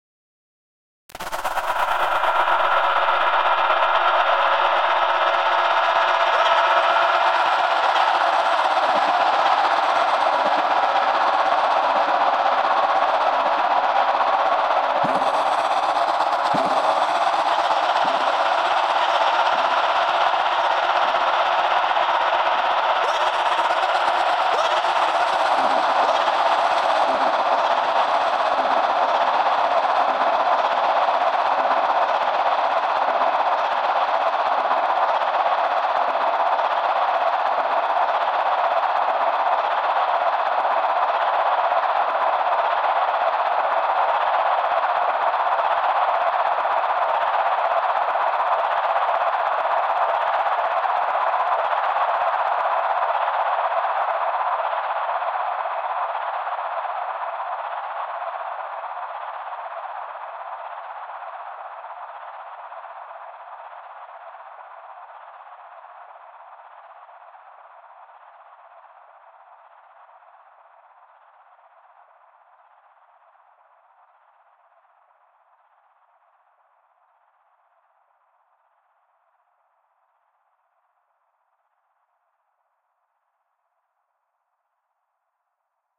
tremolos delays
scream, processed, fx